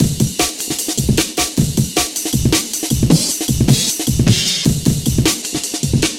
another jungle drum beat....twisted chopped bounced cut